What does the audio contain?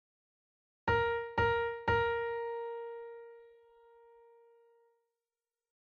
A Sharp Piano Sample